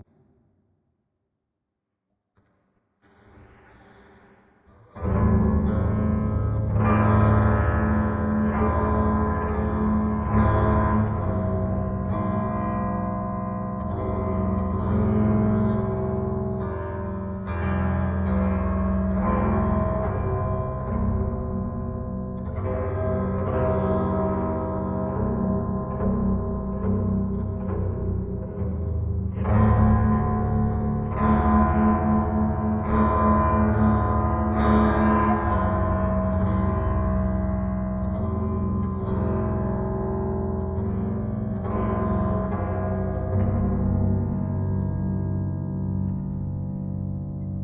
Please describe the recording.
Track 0 01GTR low
Recycled guitar from rubbish tip site- pitch shifted down- intended as raw material for creating atmospheres, sound effects or new samples etc
cheap, effect